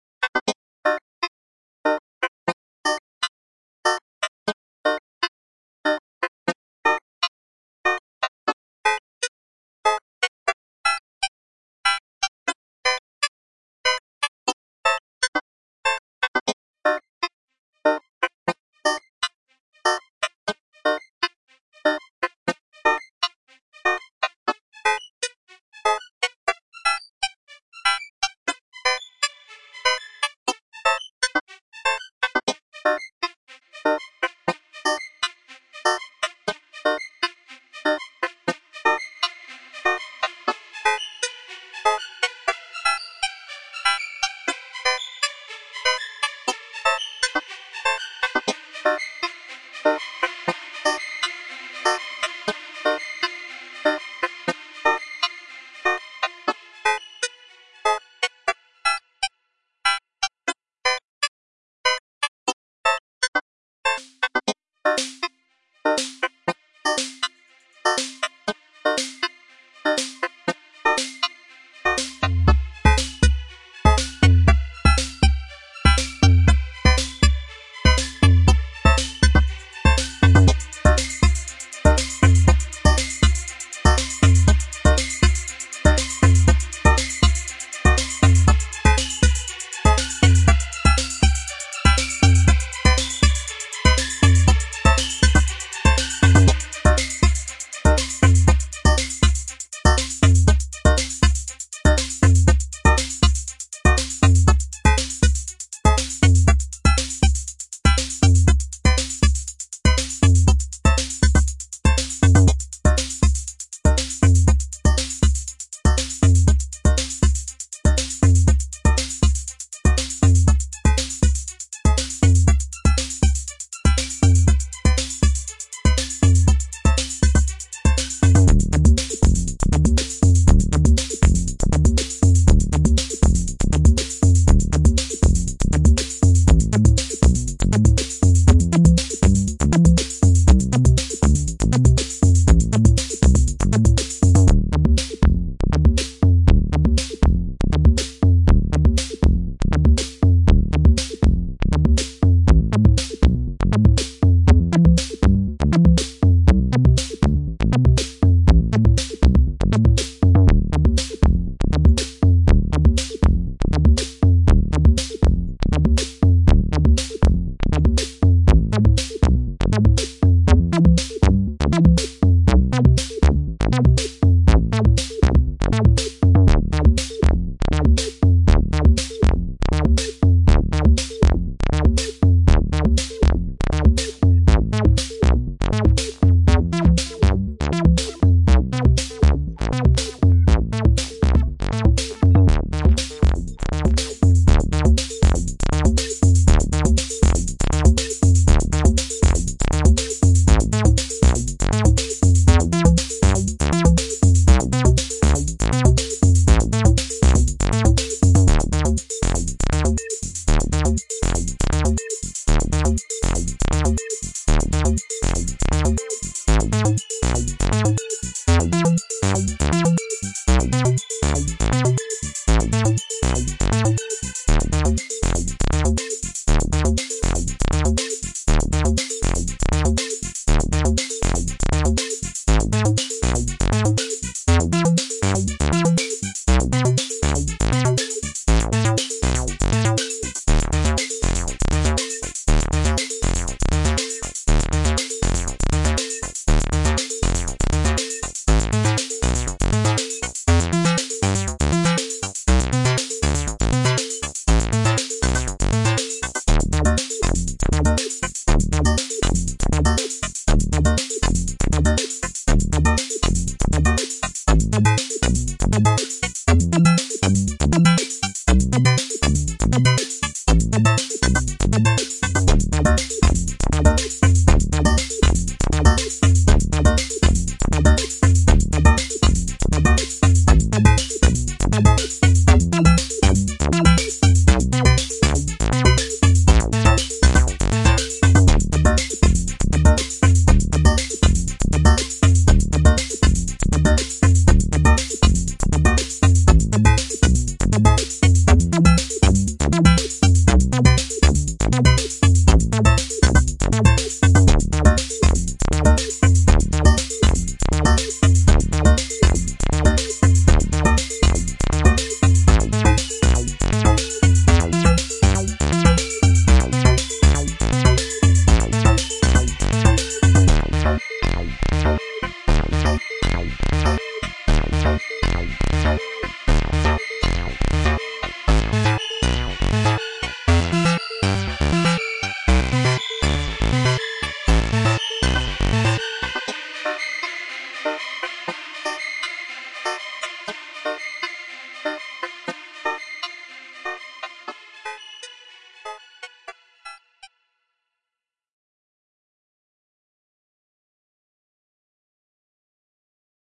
VCV Rack patch
beat, drum, percussion, modular, rhythm, electronic, digital, synth, electro, synthesizer, groovy